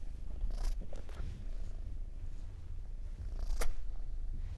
Old cat softly sneezing and very lightly purring. Recorded with Studio Projects B-1 into Tascam DA-P1 portable DAT recorder.